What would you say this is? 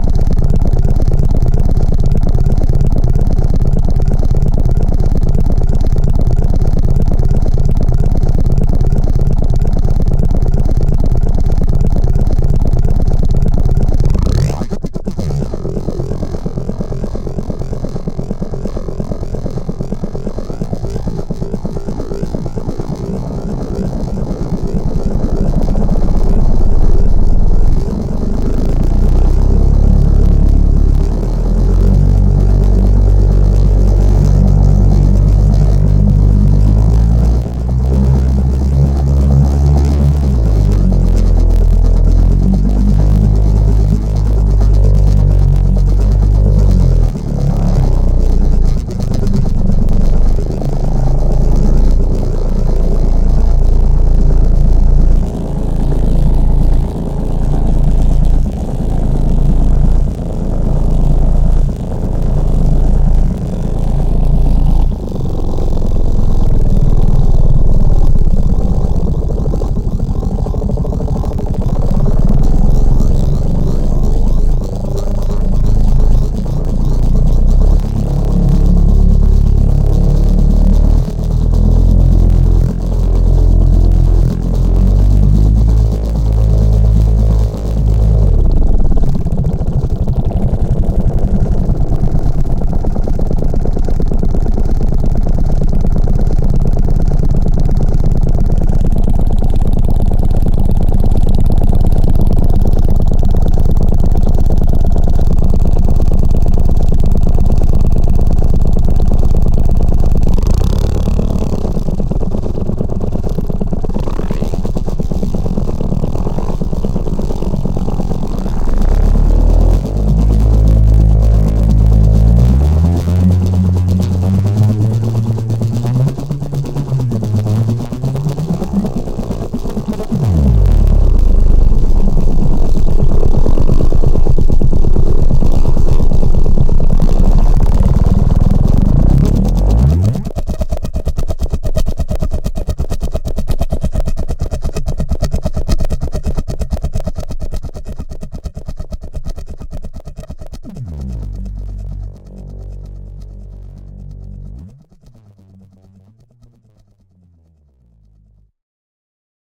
A small sampel and a ton of automation made this weird engine like sound.